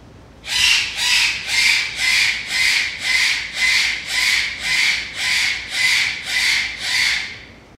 Constant screeching calls from an Eclectus Parrot. Recorded with a Zoom H2.